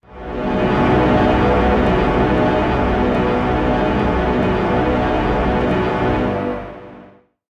Weird String SFX
A weird nice little string I've made using synthesis.
One-Shot, Synthesis, String